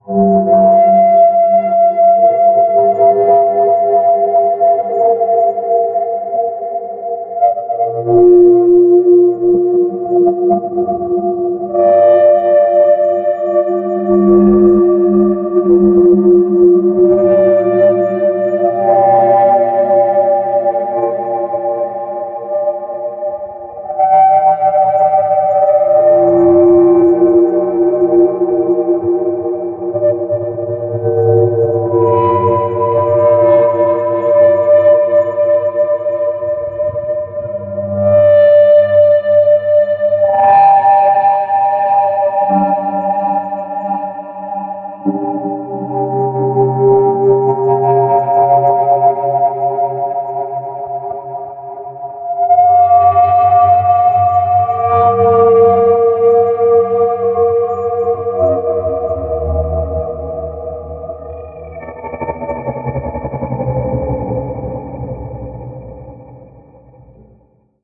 Samurai Jugular - 24
A samurai at your jugular! Weird sound effects I made that you can have, too.